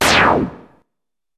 progressive psytrance goa psytrance